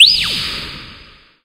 Short button sound. Recorded, mixed and mastered in cAve studio, Plzen, 2002
ambient, press, short, synthetic